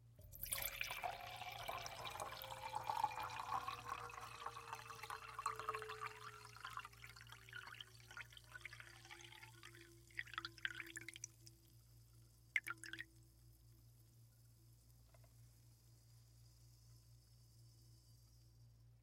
Continuous pour of liquid into empty wine glass until full, bubbles fizzing